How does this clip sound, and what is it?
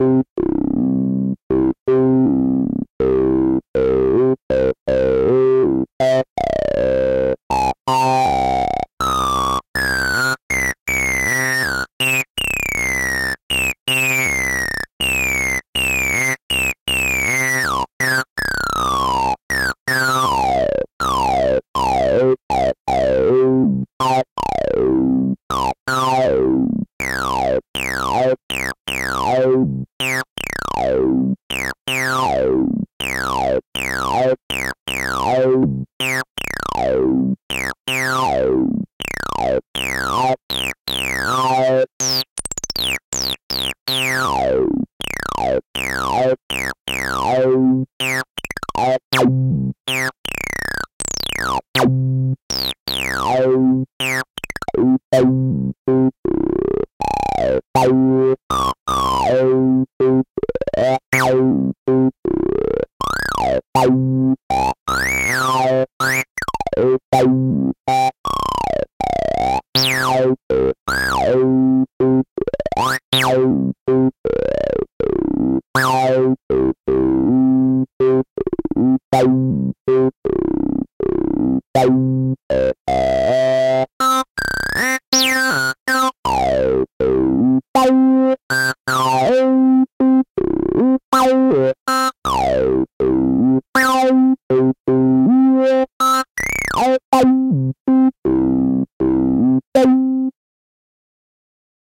tb-03 40bpm C-note
Sampled from my Roland tb-03. C-notes at 40bpm, with the built-in distortion turned up and a lot of knobs tweaked.
303, tb-03, bassline, acid, synth, bass, loop